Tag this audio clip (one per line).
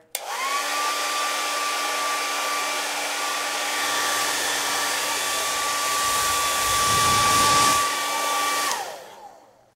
class
sound